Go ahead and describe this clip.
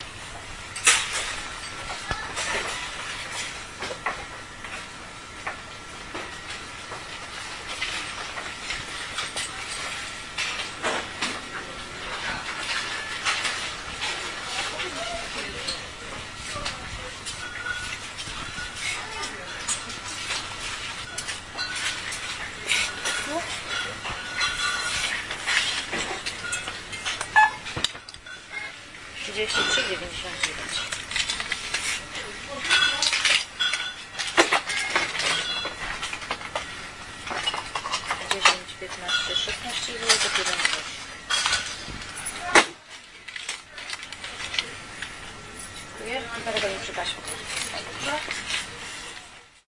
waiting for cashier in Lidl 180910
18.09.2010: about 20.30 in Lidl supermarket on Fabryczna street in Poznan. Waiting for the cashier in alcohol section and sounds of cash desk.